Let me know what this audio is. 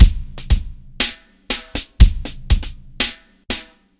4/4 beat 120bpm
120bpm, 4, beat, drum, drum-loop, drums, loop, rhythm, rhythmic